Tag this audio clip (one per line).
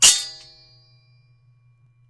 Clash
Hit
Medieval
Swing
Weapon